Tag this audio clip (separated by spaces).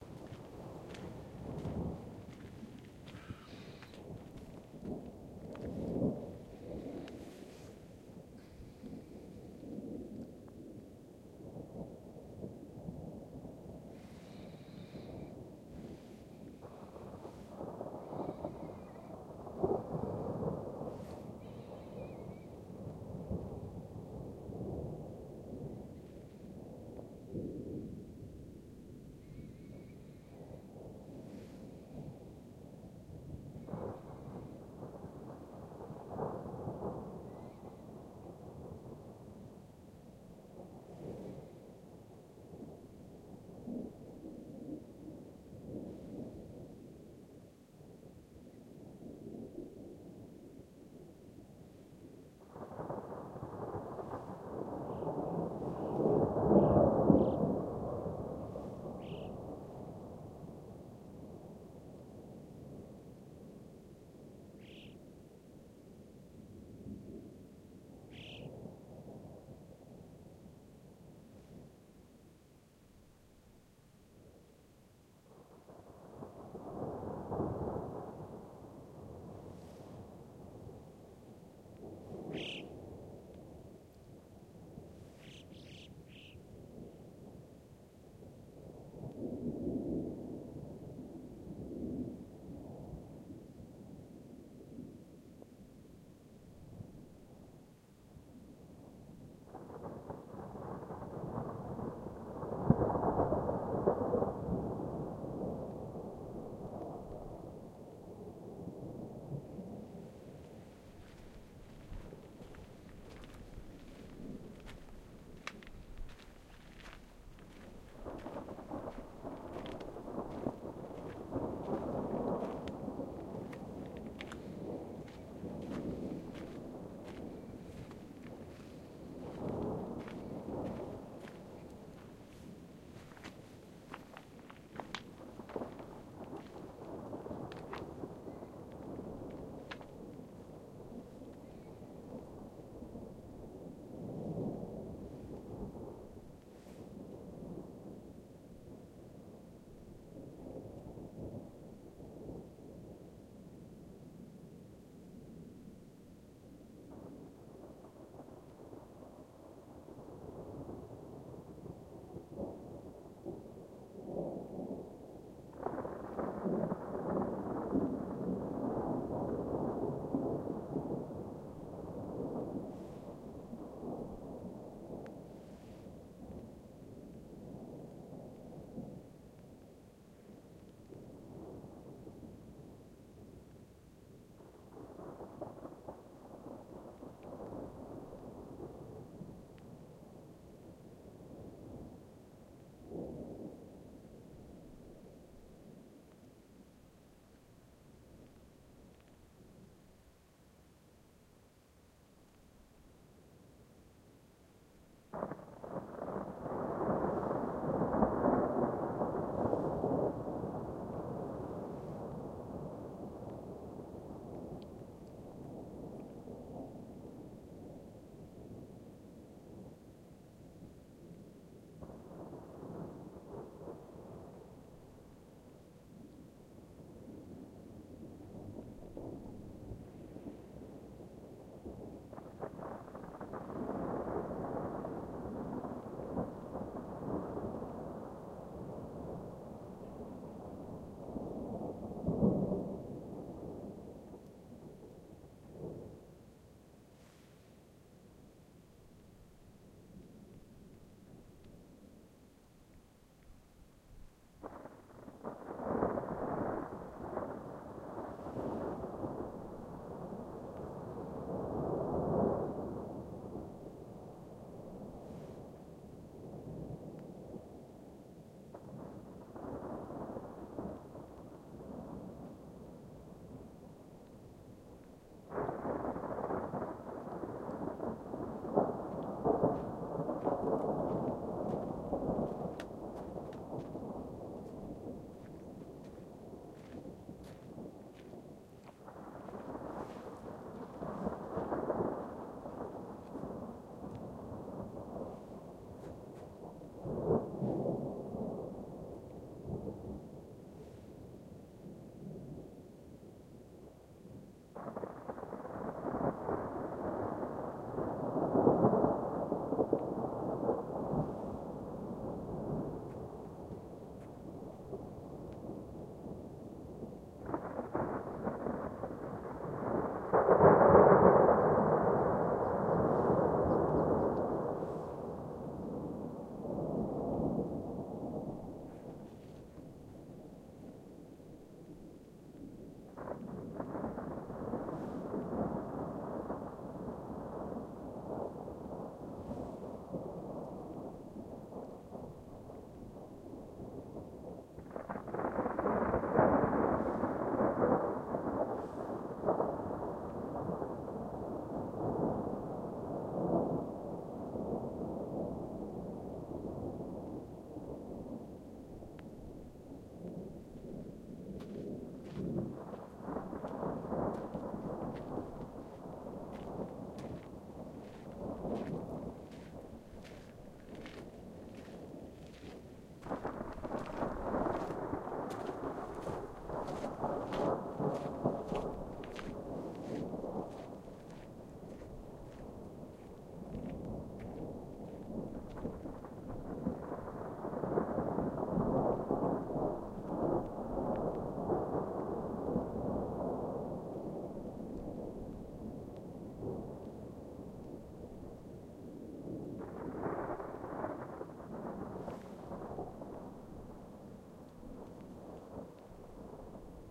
Dolomites fieldrecording